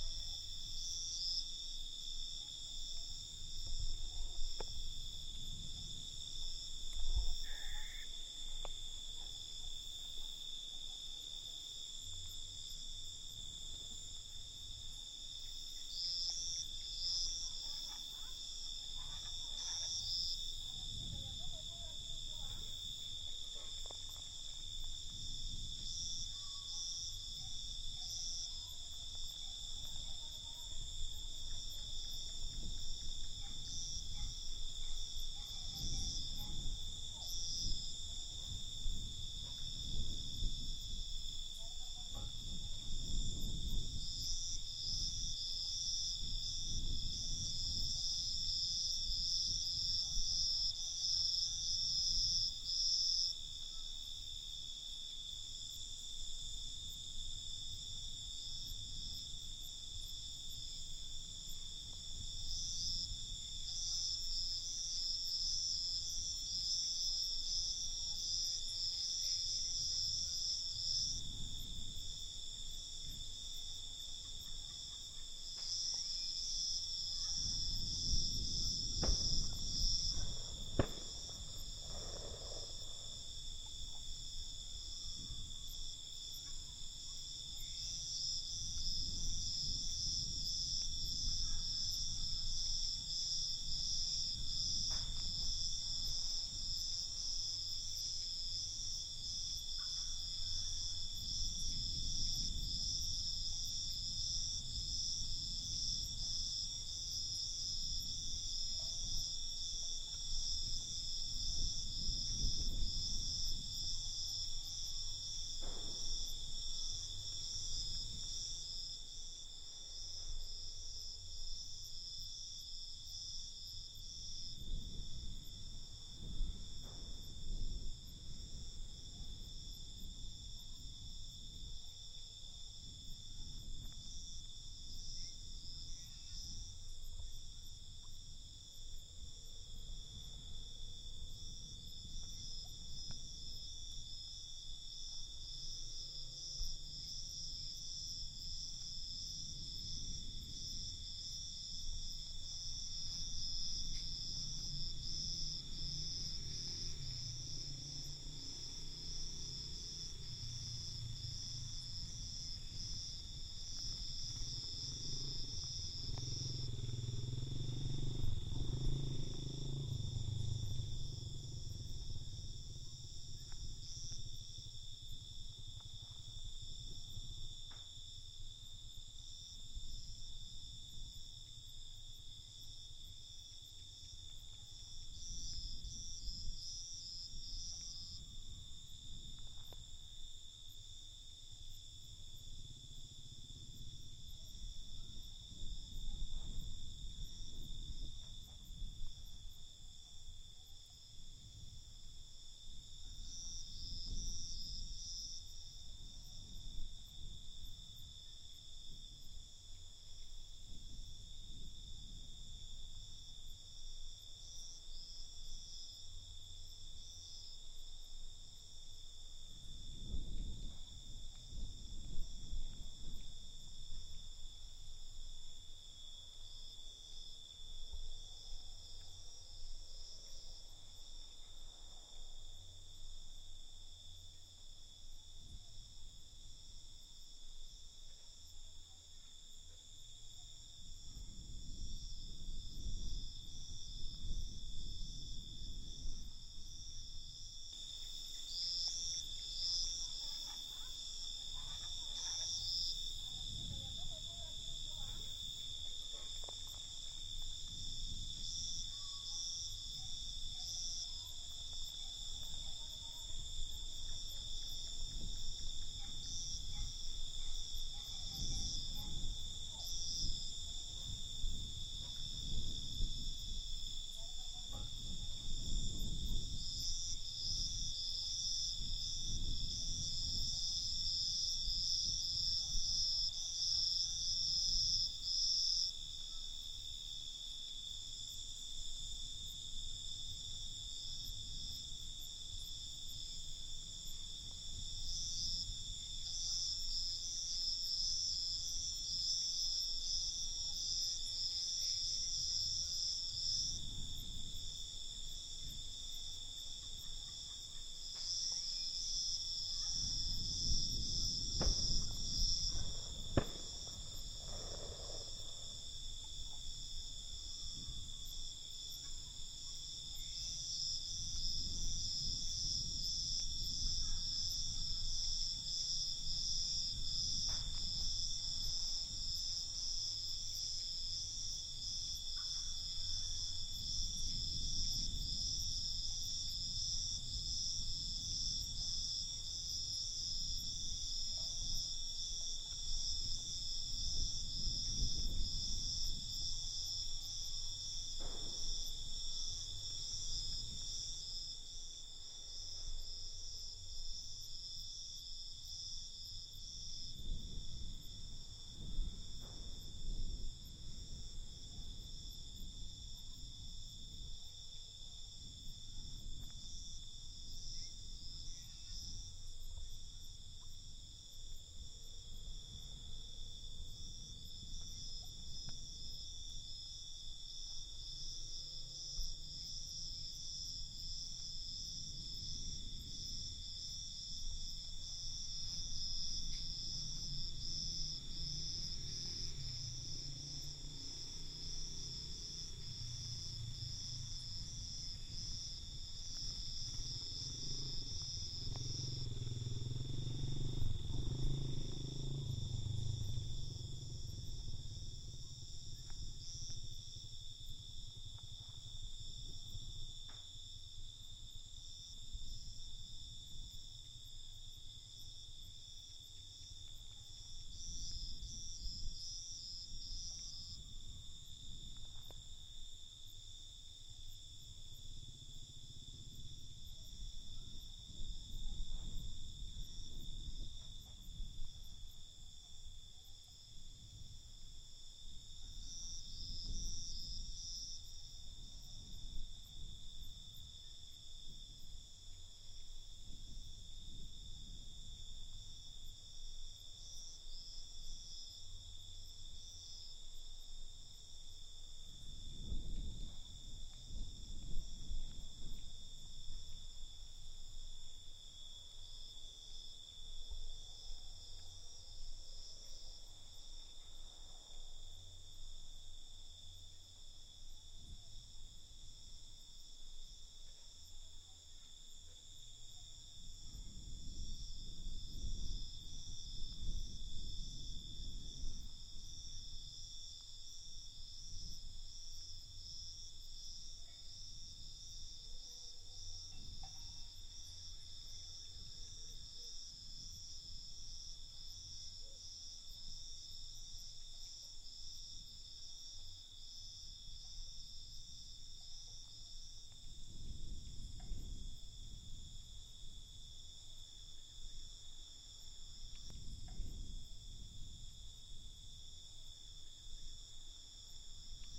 Night sounds of night, mainly crickets, recorded at San Francisco Libre, Nicaragua. You will also hear owls, birds, dogs barking, wind, low human voices, TV sounds, fire crackers, and a motorbike passing, among others. You may need to make some adjustments.